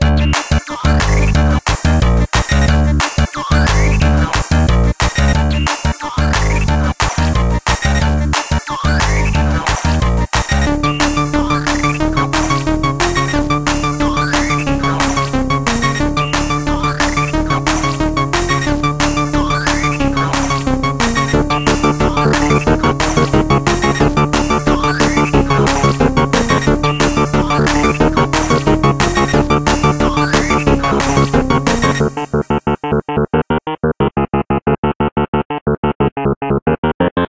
Very stupid and ugly loop (with different parts and a lot of 16bit instruments).